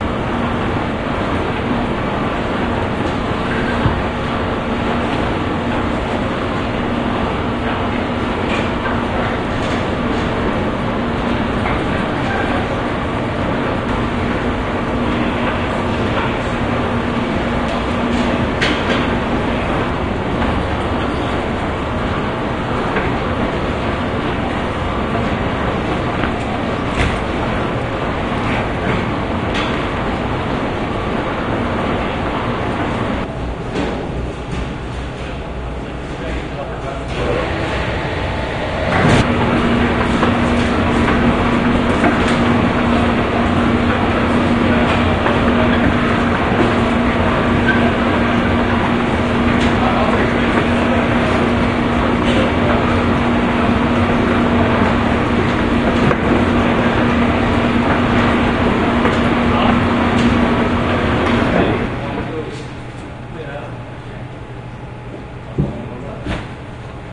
Airport Baggage Conveyor with Background Voices
Luggage being handled via an automatic, mechanized conveyor system to bring baggage to the arrivals. (1:08)
crowded, electric, noisy